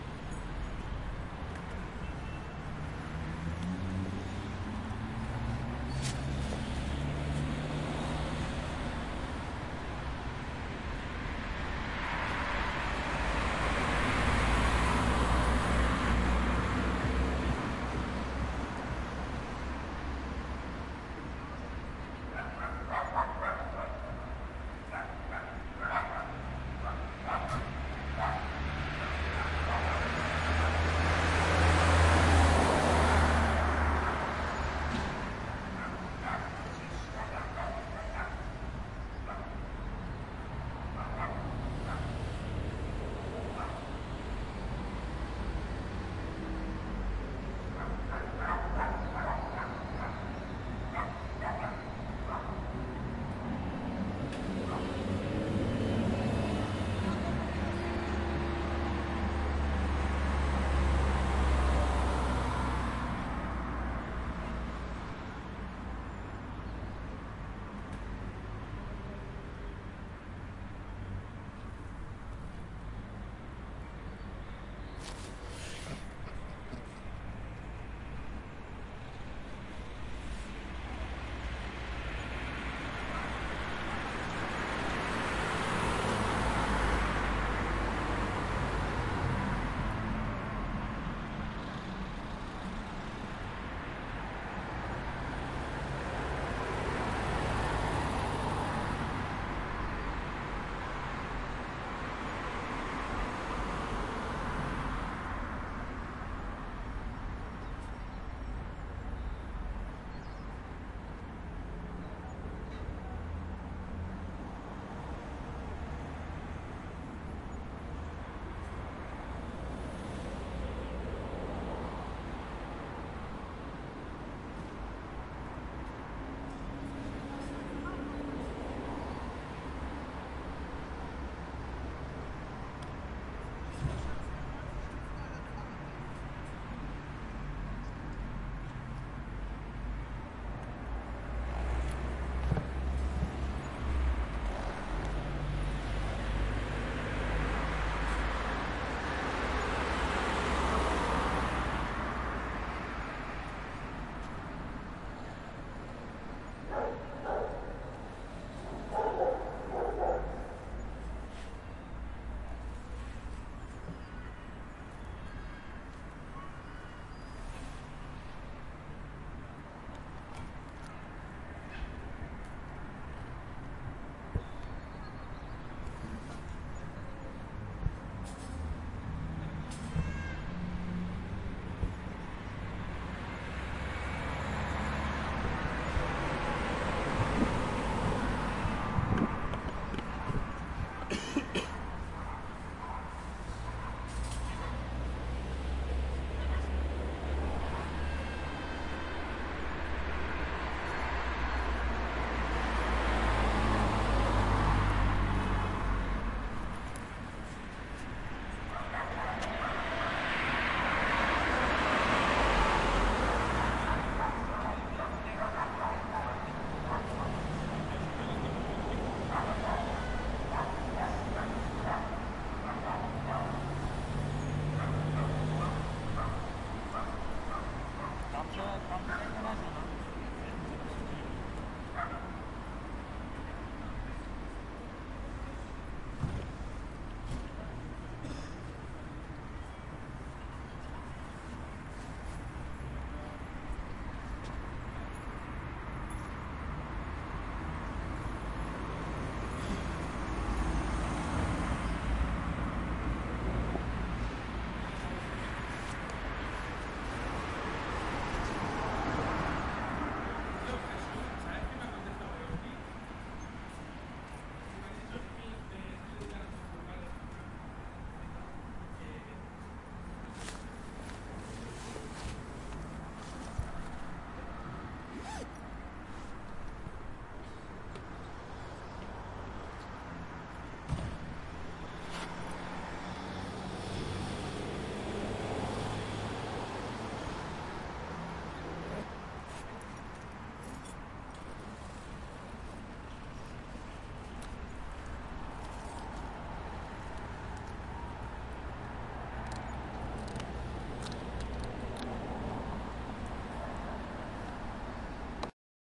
Ambient sound recorded from the square in front of a university residence. Noises of cars of the next street, faraway tweeting and barking.
Recorded with a Zoom H4n recorder.